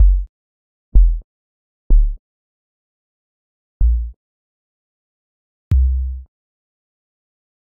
several kicks made in BLOK modular
kick, synth